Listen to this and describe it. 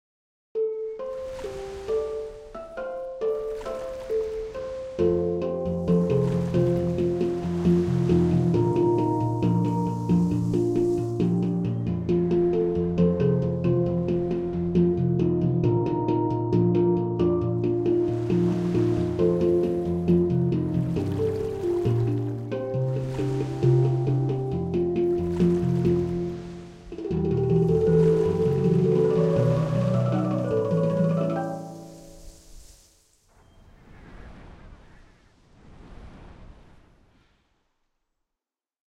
Happy Day in Beach Hand Pan
Music Sheet AI generated: Payne, Christine. "MuseNet." OpenAI, 25 Apr.
and
I rewrote it
SFX conversion Edited: Adobe + FXs + Mastered
Music
Peaceful, beach, Steel-Drum, Music, Sea, Steel, Score